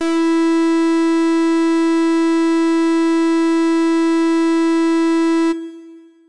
Full Brass E4
The note E in octave 4. An FM synth brass patch created in AudioSauna.
synth, synthesizer